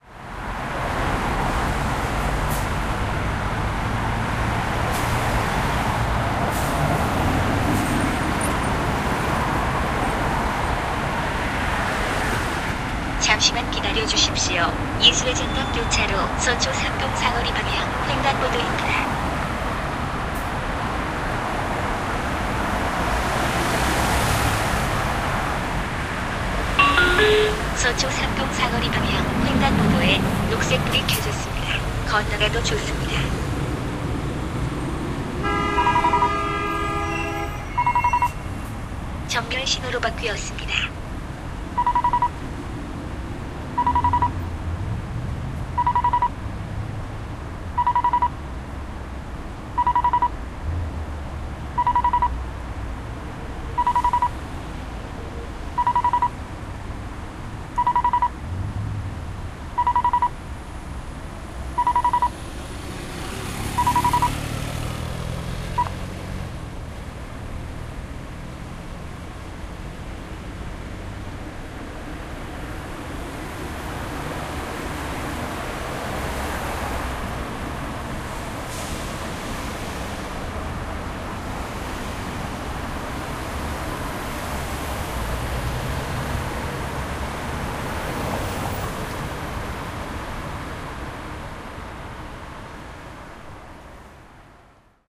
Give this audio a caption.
Pedestrian Crossing Seoul
Talking traffic lights recorded near the Seoul Arts Centre, South Korea.